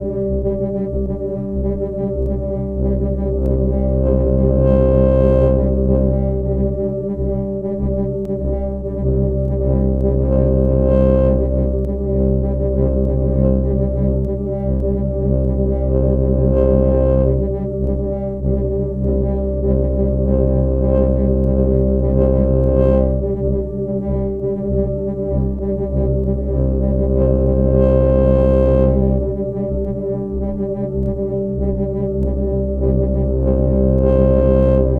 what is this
VintageSpaceStation Low
Part of assortment of sounds made with my modular synth and effects.